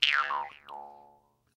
Jaw harp sound
Recorded using an SM58, Tascam US-1641 and Logic Pro